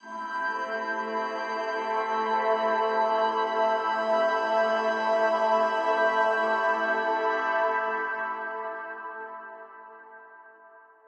Earth In A Tube
A luscious pad/atmosphere perfect for use in soundtrack/scoring, chillwave, liquid funk, dnb, house/progressive, breakbeats, trance, rnb, indie, synthpop, electro, ambient, IDM, downtempo etc.
reverb, ambience, 130, 130-bpm, house, morphing, dreamy, soundscape, liquid, effects, pad, melodic, wide, expansive